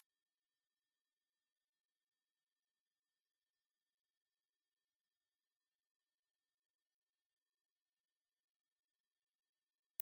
This the actual sound used to shoo off teenagers from storefronts. It is VERY irritating and I'm quite certain it's damaging after a while. My ears feel numb everytime I hear it.